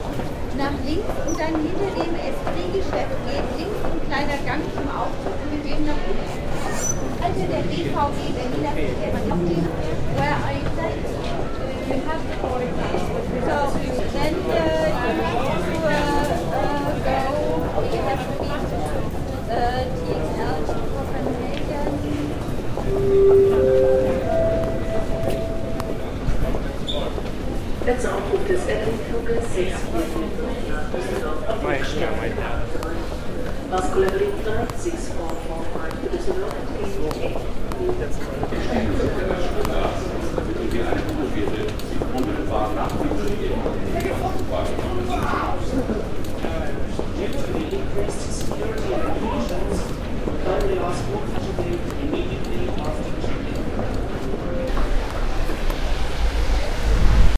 tegel airport berlin- otto lilienthal flughafen
you can hear the information girl talking in very german english -and the typical announcements
you can hear on an airport....
metropolis- berlin geotagged soundz
berlin, geotagged, metropolis, soundz